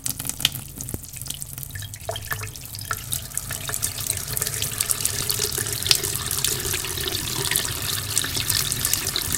Water from kitchentap